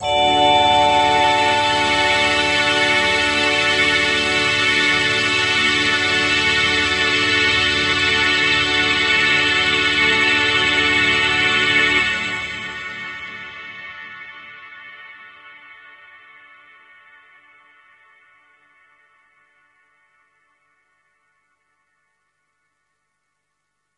Space Orchestra D3
Space Orchestra [Instrument]
Instrument, Orchestra, Space